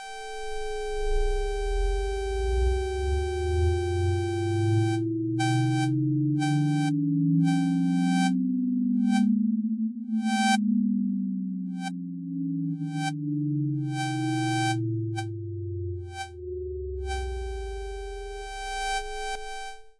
BASTIEN Samantha 2014 2015 SpiritDetector
Détecteur d'esprits
- Description of production -
Tittle : Spirit Detector
Sound : Created with Audacity - possible loop
Contents : synthetic sound, 3 pists (Pist 1 : whistle sinusoid 440 to 2.62 hz amplitude 0.2 to 0.7 linear, envelope down up large, stereo left 70% echo 1" 0.2 descending, volume -13dB. Pist 2 : same whistle, same echo, same volume, inverse, envelope variation fast, stereo right 70%. Pist 3 : mono, Sawtooth 777hz 0.7 amplitude, wahwah frequency LFO 1.5 deepness 70% resonance 2.5 lag 30%, pan center, volume -21dB, normalize -14dB, envelope interuption)
Effect : Fade In, Fade Out, Normalize -0.1dB
- Typologie -
Code : Impulsion entretiens complexe(Nx)
- Morphologie -
Masse : fond ambiance avec pic
Timbre harmonique : basse vibrante
Grain : lisse
Allure : pic sonore
Dynamique : note récurrente
Profil mélodique : variation avec pic
Profil de masse : (site) grave avec notes aigües (calibre) enveloppe globale avec pic